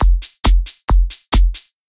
TR LOOP 0305
loop psy psy-trance psytrance trance goatrance goa-trance goa
goatrance, loop, psy, psy-trance, psytrance